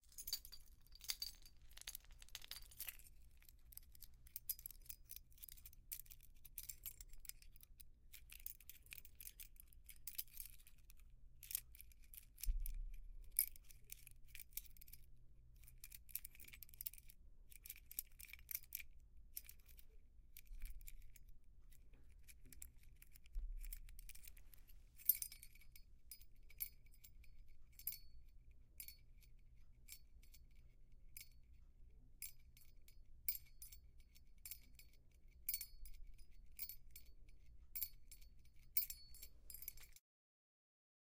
6-License plate
License, metal, plate